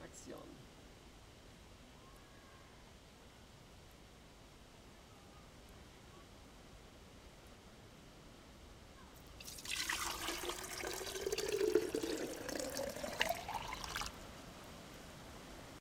pour water 015
jar, jug, liquid, pour, splash, water